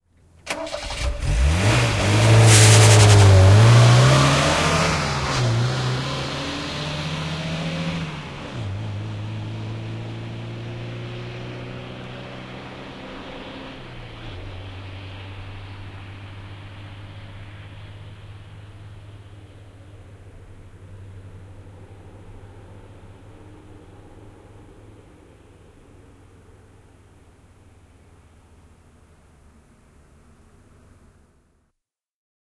Ford Cortina, vm 1973. Käynnistys, kiivas lähtö, etääntyy. (Ford Cortina, 1600 cm3, 72 hv).
Paikka/Place: Suomi / Finland / Sammatti
Aika/Date: 15.09.1980